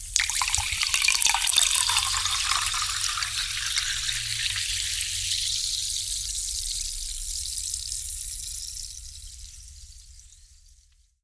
Champaign pouring
the sound of champagne being poured into a champagne flute